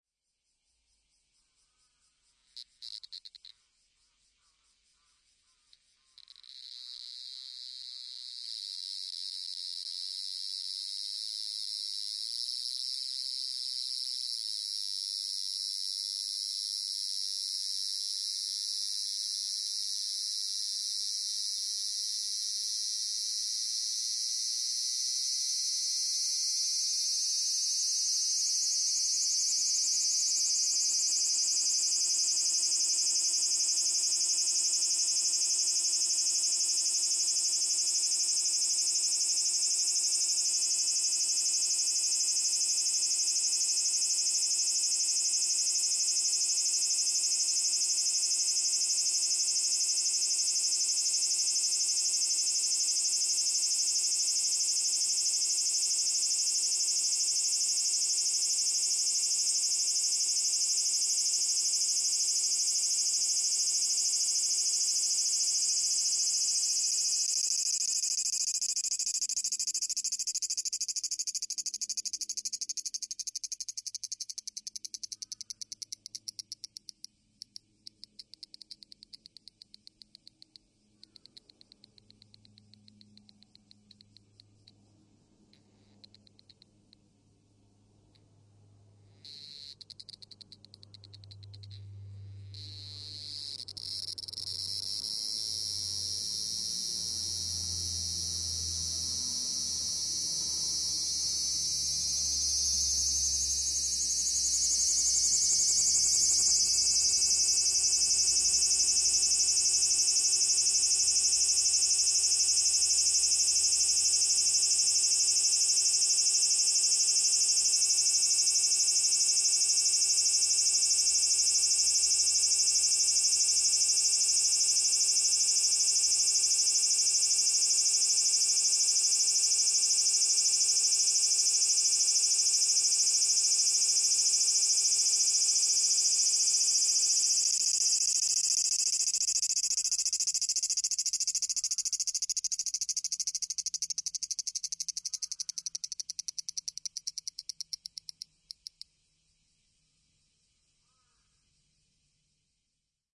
A single cicada lands on the window screen in Nagoya, Japan, 24.07.2013. Recorded with a Sony PCM-M10 placed at 10 cm of the cicada, you can hear all of cicada's 'singing' process.
Summer, Close-up, Insect, Cicada